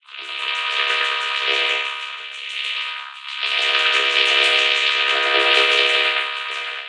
RadioAM wah voc ppdelay
Recording of an AM output from AIWA FR-C12 Radio.
Sound postprocessed with PingPongDelay, WahWah and Vocoder using Ableton Live 8.3.
wahwah, pitched, radioAM